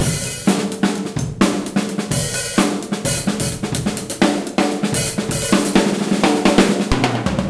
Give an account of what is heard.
breakbeat loop 5 4bars 128bpm
4 bar breakbeat/drumgroove with fill in bar 4. Seamless loop, 128bpm.
Roomy recording of a trashy drumkit from a music school, recorded with a Zoom H4.
128bpm, beat, break, breakbeat, drum, drum-loop, drumming, drums, drumset, funky, groove, groovy, improvised, loop, percussion, rhythm, trashy